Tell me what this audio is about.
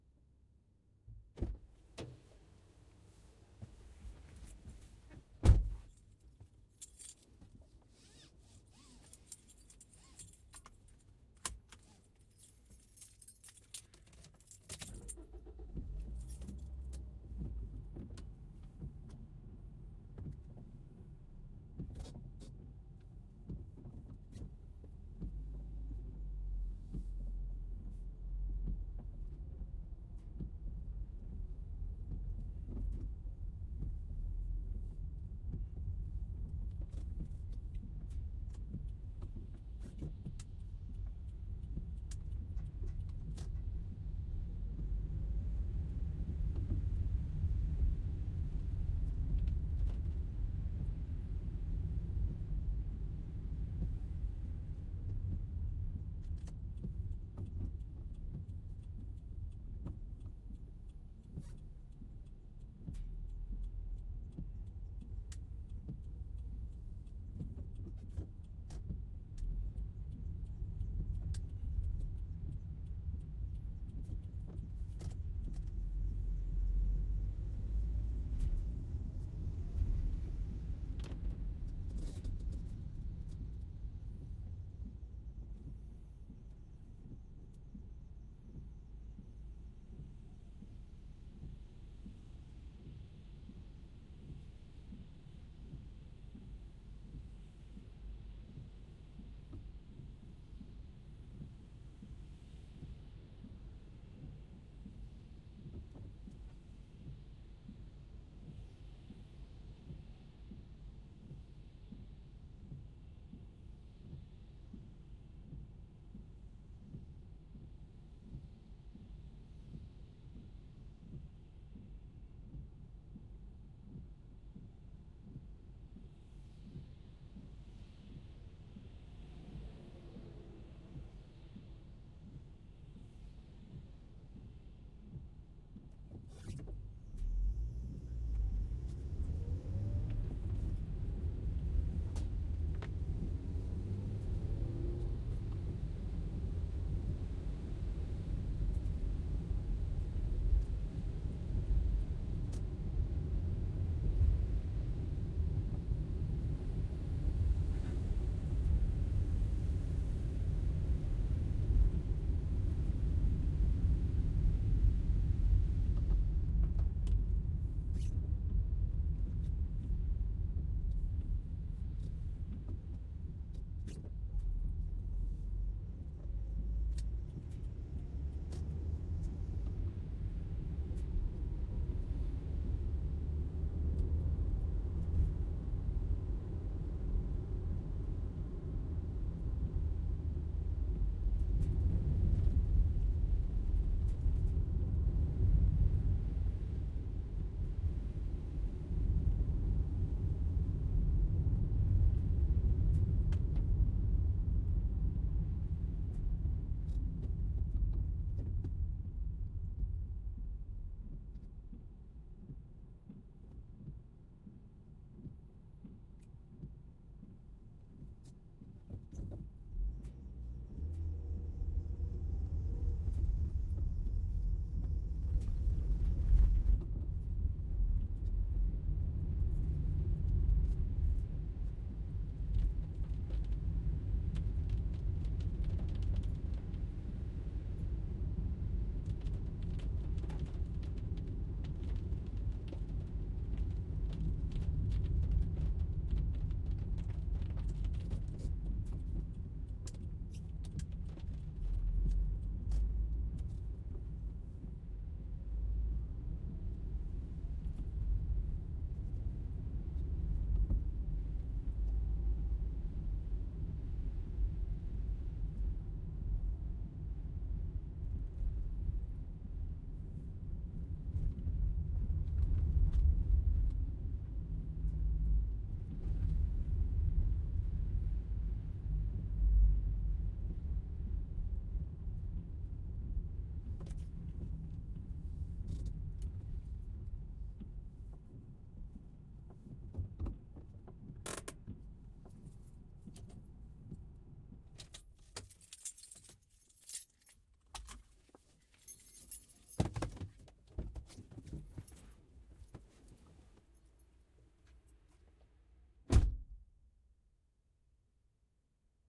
Short drive, interior
A short ride in my shitty Toyota Yaris. Rolling from before I get in, until I get out.
Recorded with a Sound Devices 744 and a Beyerdynamic MCE 82 stereo microphone riding shotgun.
acceleration, car, car-door, car-seat, drive, driving, engine, handbrake, ignition, interior, keys, motor, parking, road, seat-belt, start, toyota, vehicle, windshield-wipers, yaris